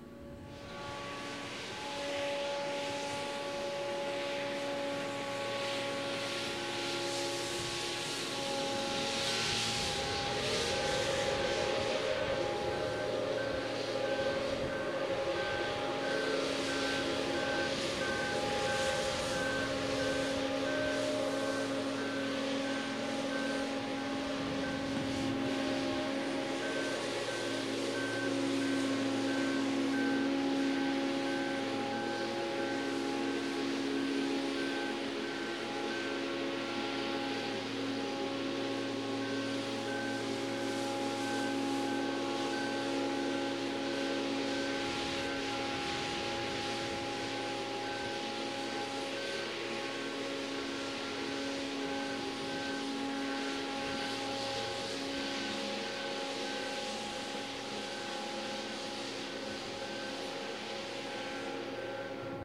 A boat lift working away down on the docks. Recorded with a Tascam recorder.
boat, heavy, construction, lift, machinery, mechanical